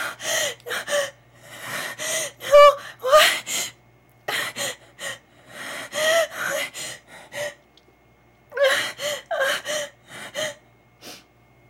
Anguish groans female
anguish groans from a woman, must be panned.